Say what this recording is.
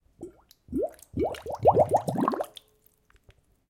water bubbles 08
Water bubbles created with a glass.
water, bubbles, liquid